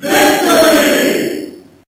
A crowd screaming victory! Down-samples to 8-bit resolution.
crowd, nes, nintendo, victory, vocal